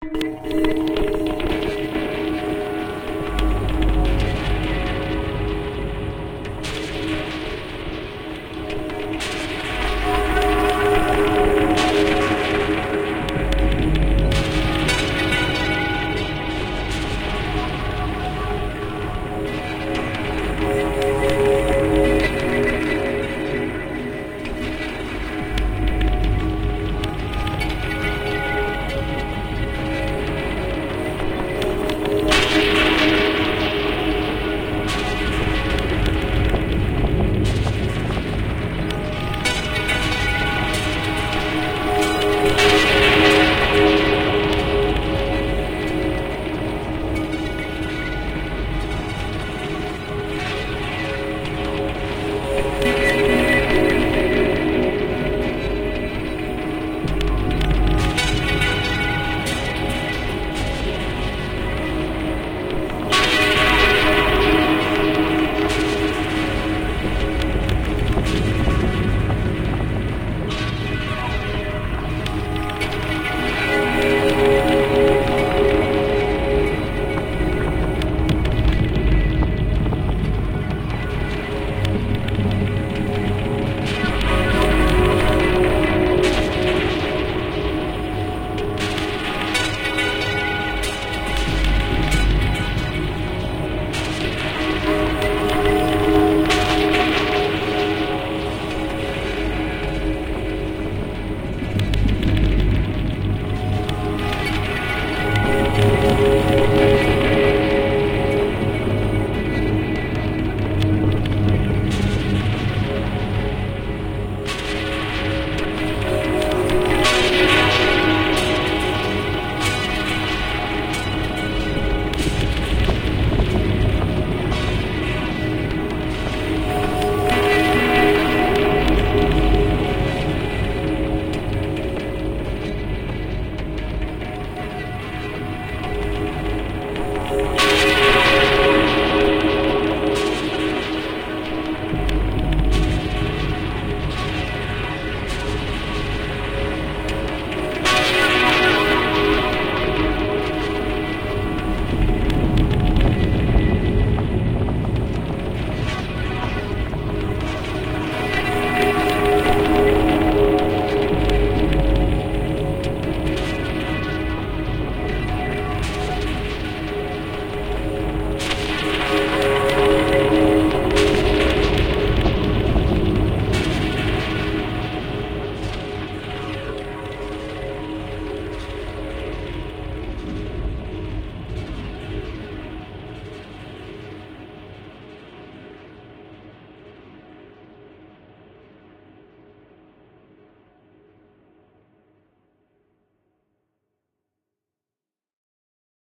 Two bell sounds and a singing bowl modulated with each other and send through a complex delay chain - produced with Absynth 4, Reaktor 5 and Ozone 3 in Logic 8.
ring-modulated, atmospheric, electronic, age, soundscape, new, processed, ambient, bell, modulation, bells